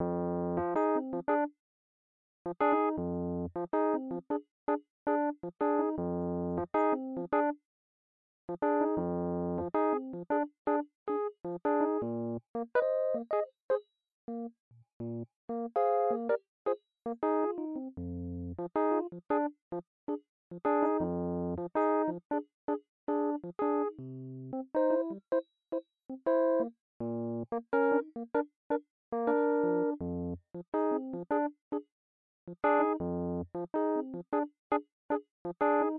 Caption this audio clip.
Song1 RHODES Fa 4:4 80bpms
beat, Fa, blues, bpm, rythm, loop, Rhodes, Chord, HearHear, 80